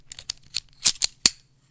A locking carabiner clipping.